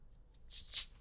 Plastic bottle soda 2
bottle of soda being opened
soda, plastic